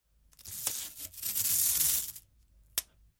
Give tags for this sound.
pull
tape
tear
masking

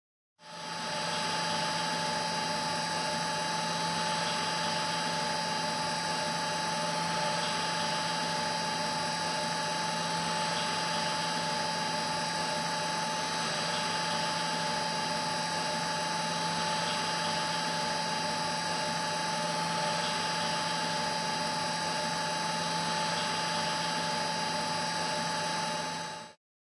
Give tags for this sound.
construction
industrial
building
machine
site
field-recording

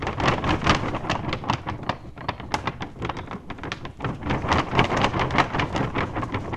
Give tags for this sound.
flag
flapping
wind

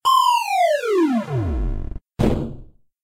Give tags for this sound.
fall
film
game
movie

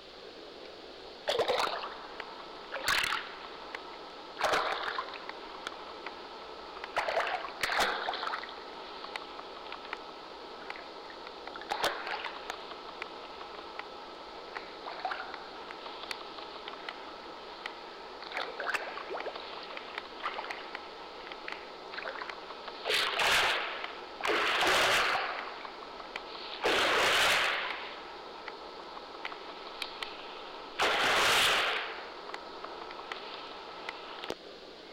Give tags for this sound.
click
water
splash
pool

